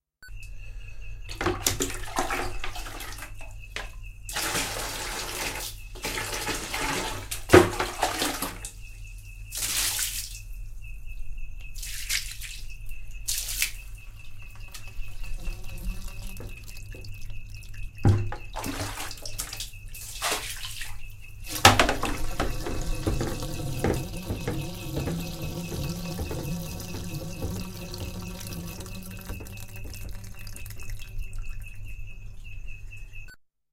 Recorded in Bangkok, Chiang Mai, KaPhangan, Thathon, Mae Salong ... with a microphone on minidisc
machines, street, temples, thailand